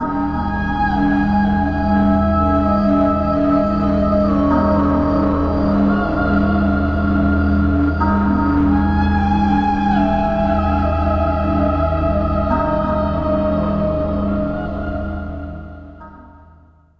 Horror Ringing
This is a female (ghost) voice singing a recurrent melody that "comes from the past"; the soundscape is a basic "horror-style" drone and lets the voice ringing over the haunted atmosphere. The vocal stem is a soprano loop I've found in a friend's hard disk. It's an E major scale melody except for the last note that transforms it into a B major scale ('cause it's a D# note, the major third in a B maj scale; if I'm wrong please tell me!). The drone-pad is a Moog-ish style (bass)pad tuned in E and recorded with Logic's ES1 synth. I've put the synth and the voice into iZotope Iris; I've selected some frequencies and upper harmonics on the resulting spectrogram with the magic pointer (Iris' users know what I mean). Then I've used Iris' tube distortion, chorus, reverb, envelope filter (on the master mix window) and added a little motion playing the pad as a fwd-bkwd drone. Enjoy!
voice, ghost, female, horror, ringing